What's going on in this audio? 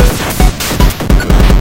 150bpm.PCMCore Chipbreak 7
Breakbeats HardPCM videogames' sounds